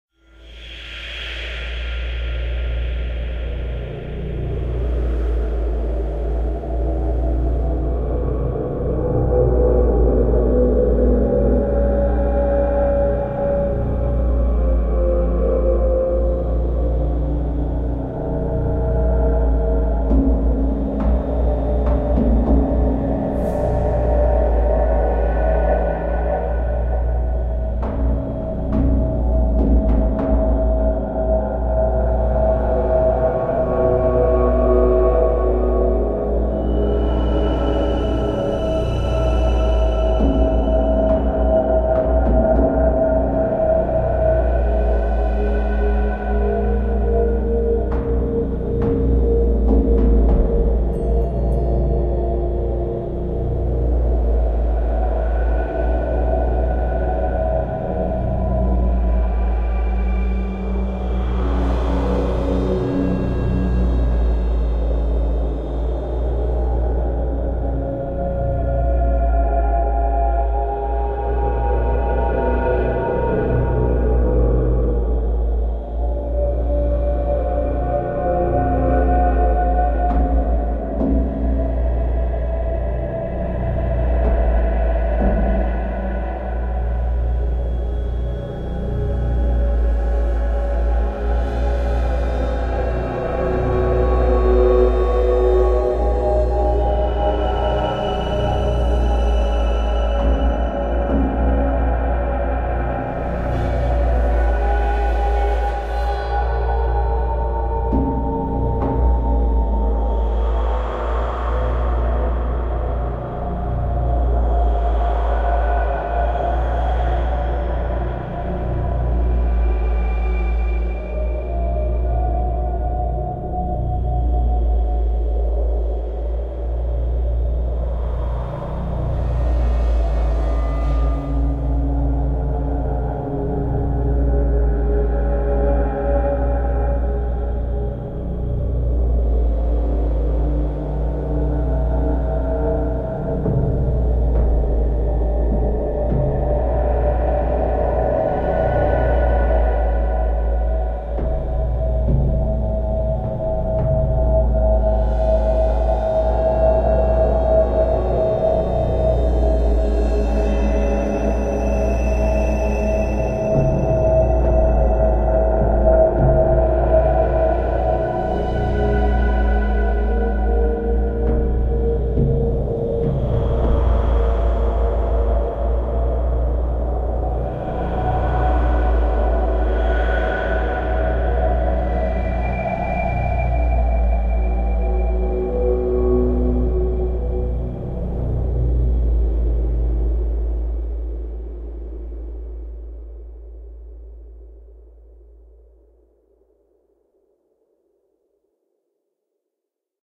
Dark Ambient Music
Post apocalyptic sound background ambiance music.
airy, ambiance, apocalyptic, background, dark, eerie, game, horror, post, synth